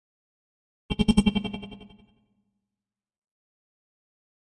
An electronic pulse.
electronic, pulse